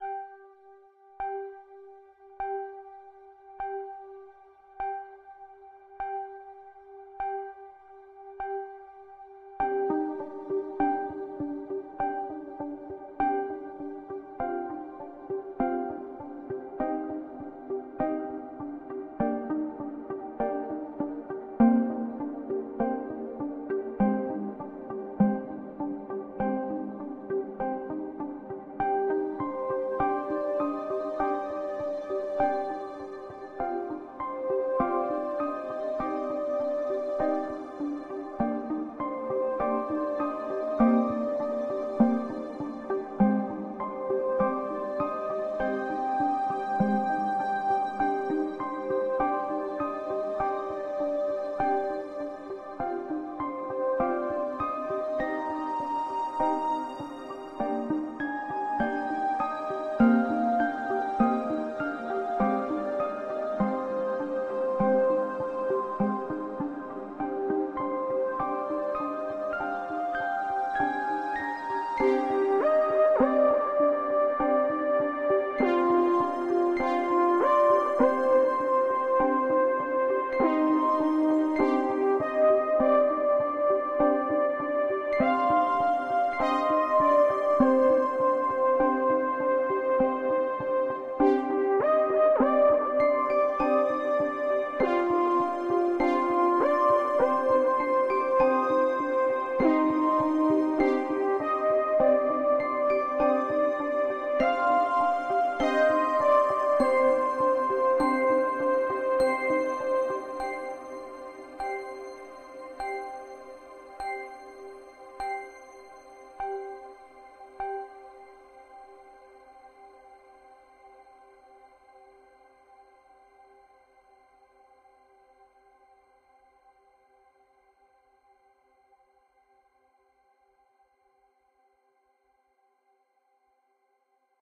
Synth based melody loop with plucked and bell sounds to be cut-up and arranged as required
bell, instrumental, loop, melancholic, melody, music, pluck, Song, synth, theme